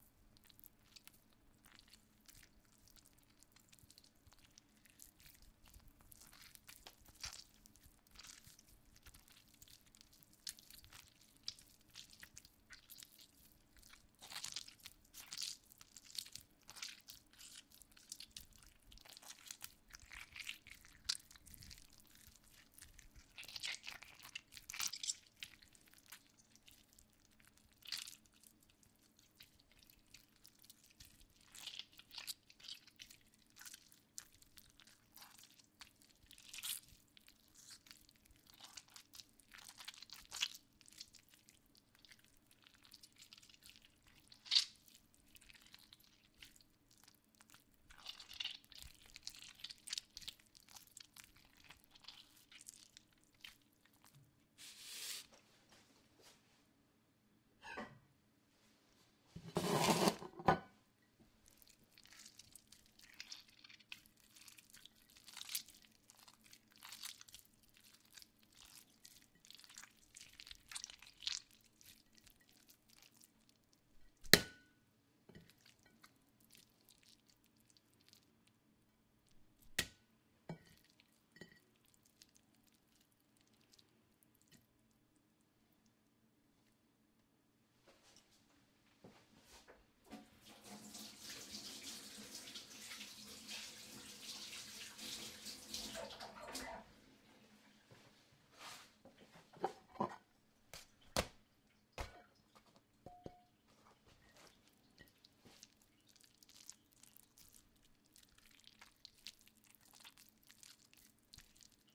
Oranges being squished in hands. Recorded with a Rode NGT2 mic into an M-Audio Fast Track Pro and Sony Vegas. Recorded in my kitchen.